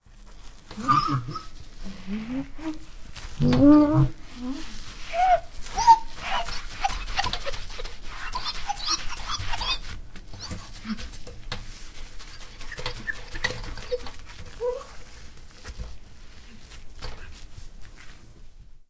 Washing Window
Swiping a glass window or mirror with a sponge.
Recorded with Zoom H2. Edited with Audacity.
clean, cleaning, glass, mirror, sponge, swipe, wash, washing